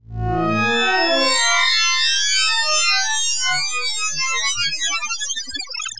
sine granulation
Cloud of micro-sines.